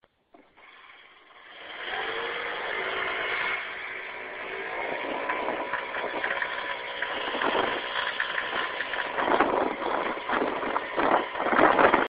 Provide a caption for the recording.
I'm having trouble with my scooter engine, and this is the sound it's making.
It's hard to describe, so I recorded a sample with my phone to post in a thread on the website.
engine, forum, moped, trouble